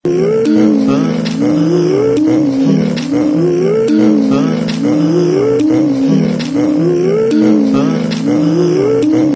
Polyphonic vocals
A set of vocals in different tones with a very light beat forming a pretty interesting texture. Perfect to use in eletronic or edm indie songs, also for sfx in cartoons or games (mainly in transitions screens) and many others situations.
Made in a samsung cell phone (S3 mini), using looper app, my voice and body noises.
dada, effects, interesting, music, song, cool, voice, weird, vignette, sound, background, baritone, vocal, vocals, beat, edm, sfx, male, dadaism, eletronic, light, indie